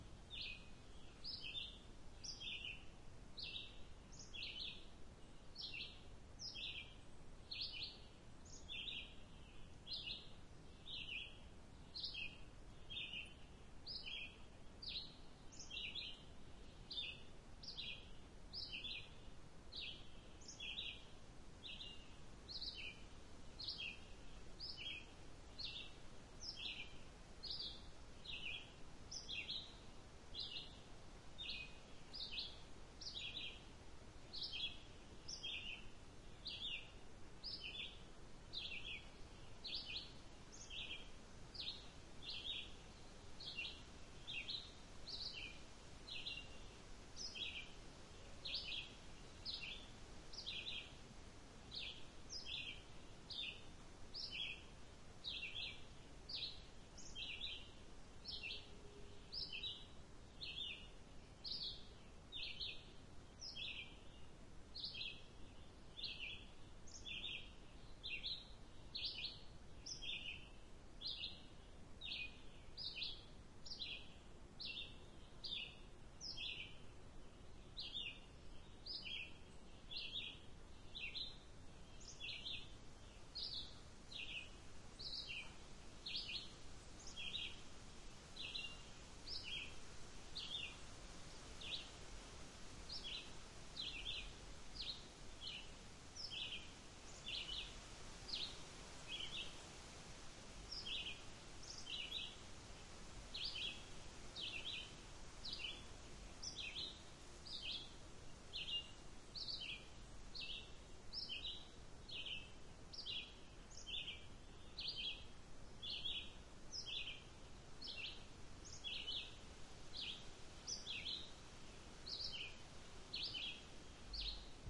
Forest, light wind, bird song 1
Forest, light wind, bird song. This sample has been edited to reduce or eliminate all other sounds than what the sample name suggests.